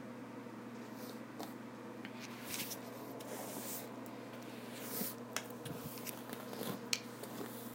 Hair Brush Through Wet Hair
Me running a brush through long wet hair a few times.
Wet, Brush, Hair, Comb